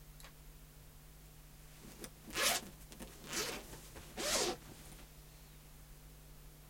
Laptop case - Unzipping L Close R Distant
Unzipping a medium sized soft laptop case. Recorded in studio. Unprocessed.
akg, backpack, bag, case, channel, close, computer, distant, dual, foley, fostex, laptop, mono, perspective, pov, rode, soft, studio, unprocessed, unzip, unzipping, zip, zipper, zipping